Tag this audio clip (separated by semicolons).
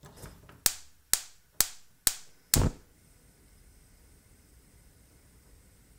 burner; clicking; fire; gas; stove